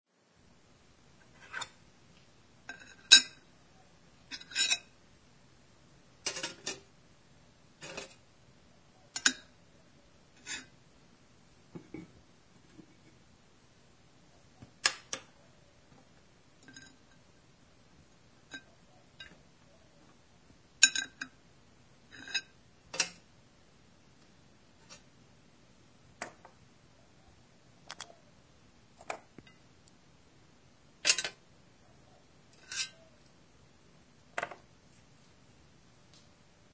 I recorded this myself of me lifting a small object to make it sound kind of like someone lifting a syringe, enjoy